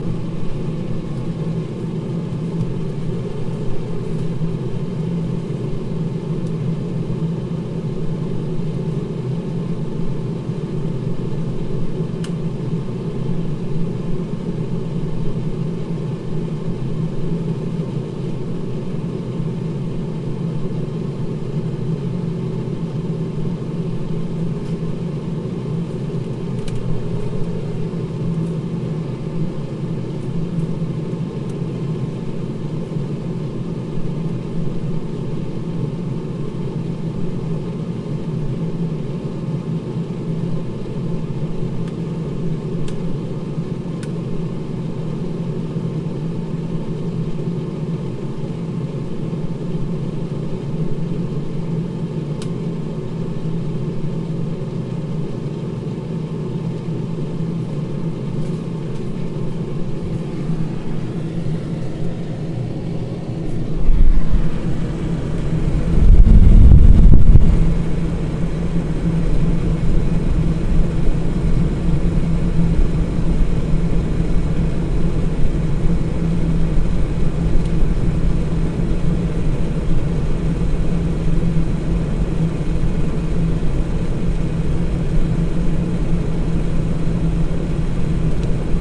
This is the sound of the electrical and mechanical humming of my freezer, as well as the air being pumped into and out of it. Recorded with a ZOOM (don't remember which model, as I was renting it out for a school project), being placed inside the freezer, near the air duct at the back. Also has some noise of either me moving it or a blast of air right into the mics at the end.